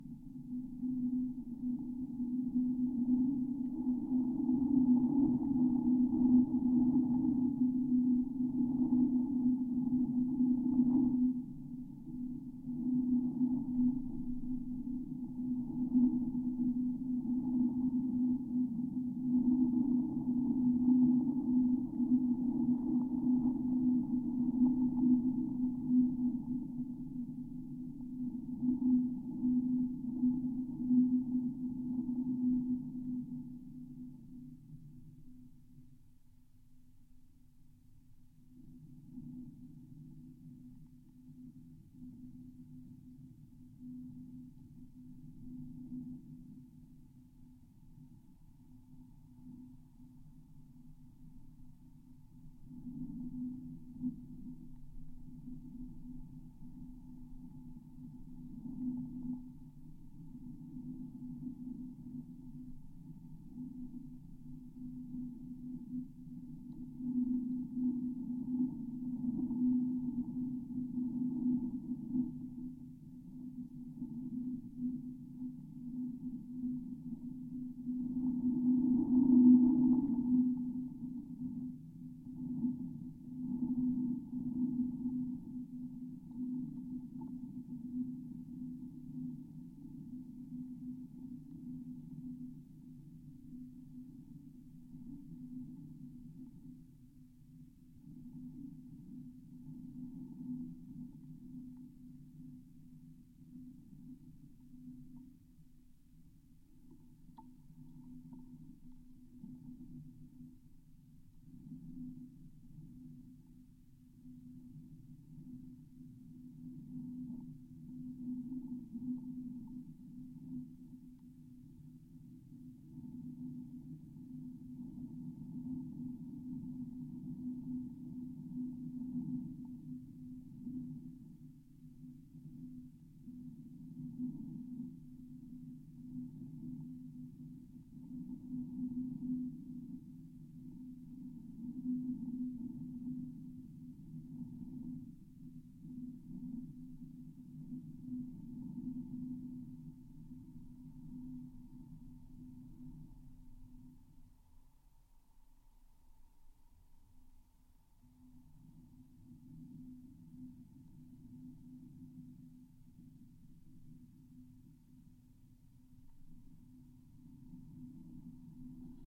(GF) Resonant wind at the train tracks
Lom Geofón attached to unused train tracks at the Haapsalu railway station. Wind makes the metal resonate.
Recorded on a Tascam DR-100MKiii.